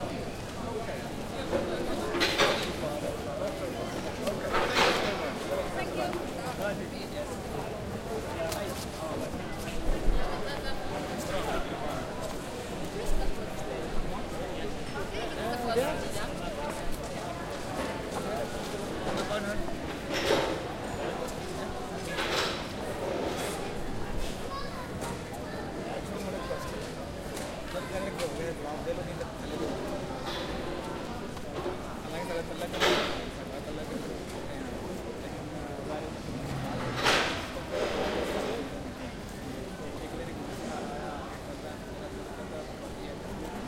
Field recording from Oslo Central Train station 22nd June 2008. Using Zoom H4 recorder with medium gain. Moving slowly around main concourse.